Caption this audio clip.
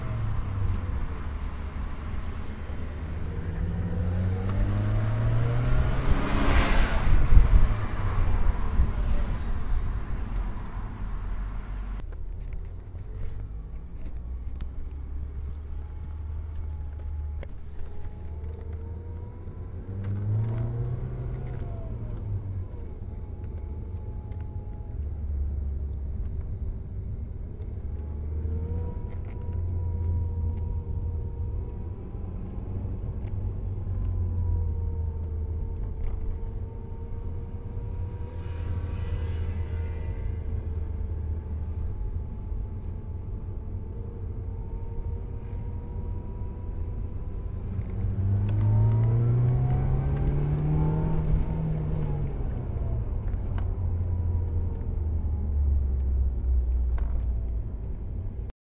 The sound has been recorded by myself for a university's project!!!
the sounds of cars are really interesting, so one day while I was hanging around with my friend I had the idea to record the sound of his car.